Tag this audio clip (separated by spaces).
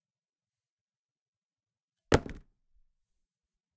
gun drop hit Impact